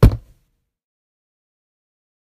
Varied hits on materials in my basement - cardboard boxes, a treadmill, wooden table, etc...most of the sounds from this pack were extracted from a recording of me striking said objects with my palm.
Because of proximity effect, I found some of these to be useful for the sound of an object hitting the ground.
drop, 2x4, foley, floor, impact, tap, wood, ground